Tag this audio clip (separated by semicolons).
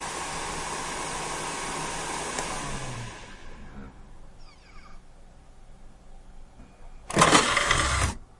cd; computer; data; disc; disk; drive; dvd; pc; tray